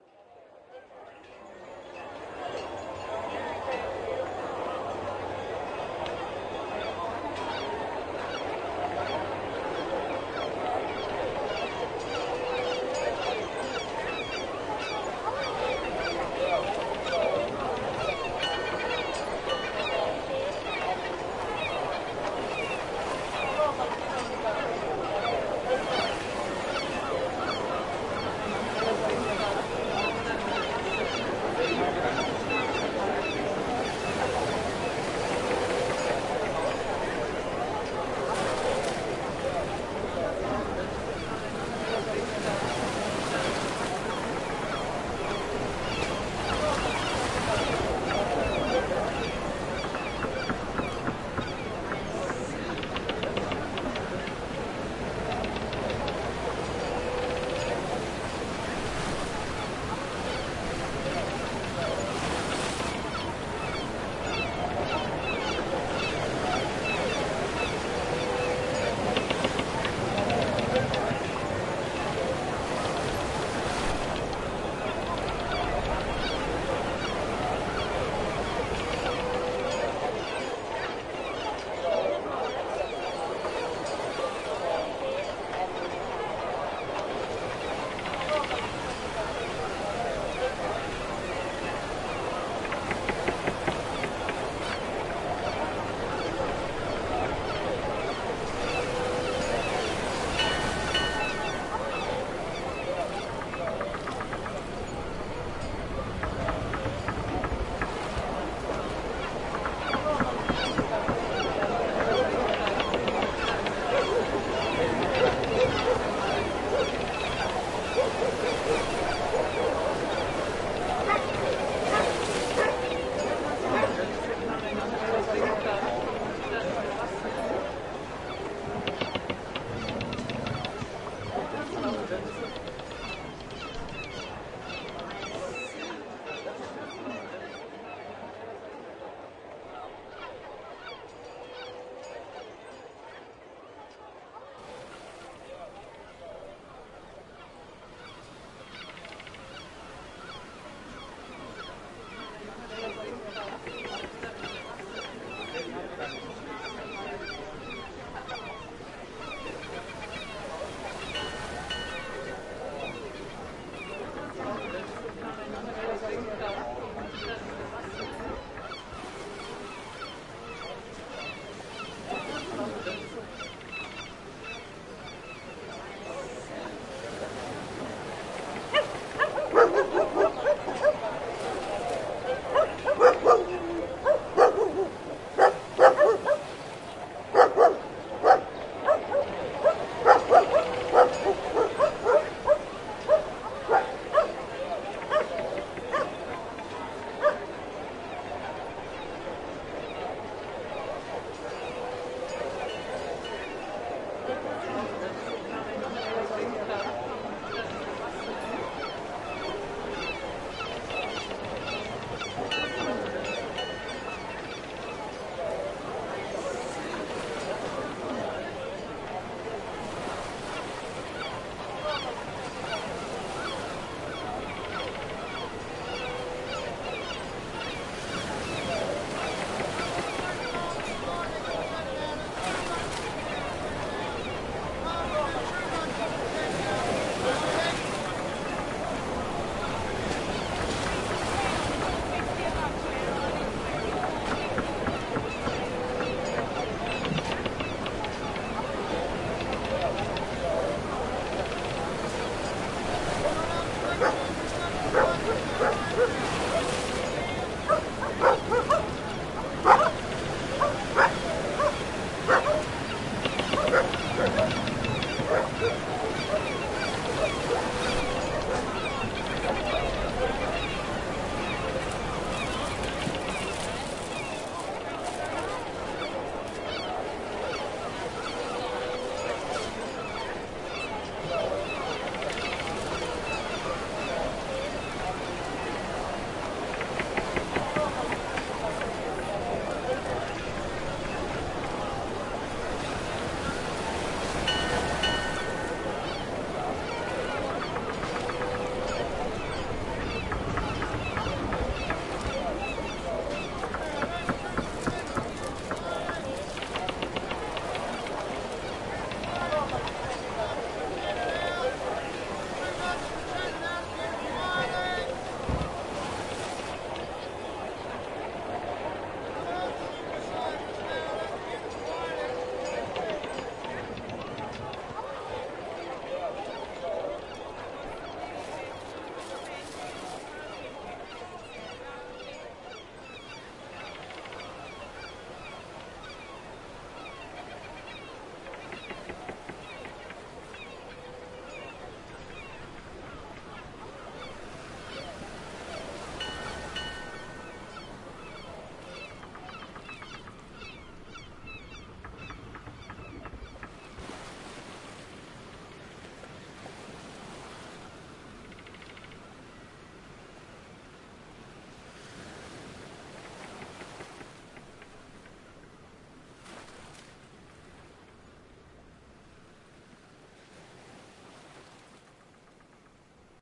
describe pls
Der neue Hafen Löwensteins.
Streets, Town